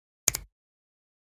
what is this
finger-snap-stereo-10
10.24.16: A natural-sounding stereo composition a snap with two hands. Part of my 'snaps' pack.
bone, bones, break, clean, click, crack, crunch, finger, fingers, fingersnap, hand, hands, natural, percussion, pop, snap, snapping, snaps, whip